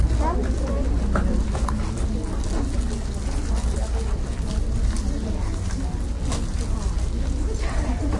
Snippets of sound in between the coming attractions and commercials inside a movie theater.
crowd, movie, theater